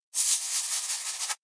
JBF Squirrel Run